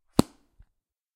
book snap shut
Snapping a book shut
close, shut, book